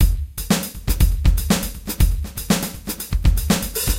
guigui loop 1 120bpm
Basic loop with reverb and delay
120 bpm